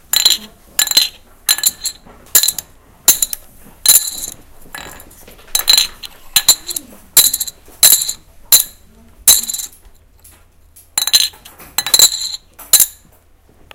dropping lids 1
dropping lids on a table.
drop, lids, plastic